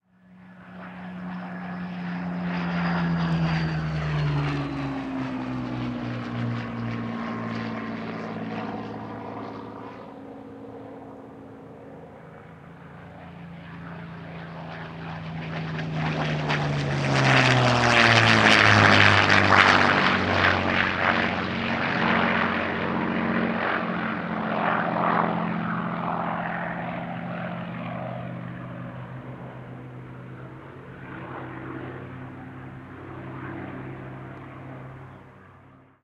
Two Spitfires Flyby
This is the sound of a Supermarine Spitfire Mk Vc with a Rolls-Royce Merline followed by a Spitfire Mk. IXe with a Packard Merlin.